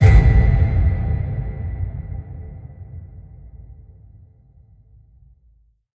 Soft Cinematic Impact
gamescore, film, dark, hit, sfx, dramatic, cinema, fx, industrial, drama, boom, orchestral, cinematic, movie, impact, soundtrack, trailer, filmscore, soundeffect